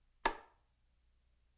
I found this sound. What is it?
Lightswitch being flicked on. 3 mics: 3000B, SM 57, SM58
swtich, flick, lightswitch